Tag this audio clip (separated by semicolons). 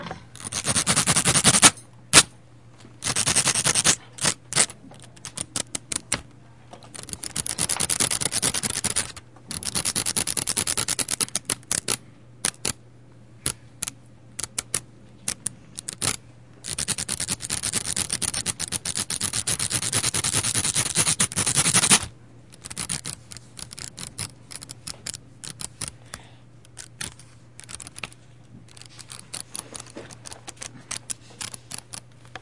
binding; France; IDES; notebool; Paris; pen; school